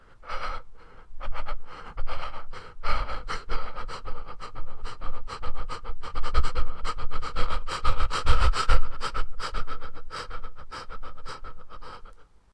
Panicked Breathing
breathing, creepy, disturbing, evil, ghost, ghostly, haunting, horror, panicked, panicked-breathing, scary